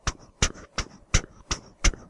Recorded by mouth